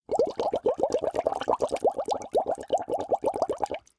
Bubbles Short 1
Blowing through a straw into a bottle of water. Short version.
ocean, blowing, water, bubbles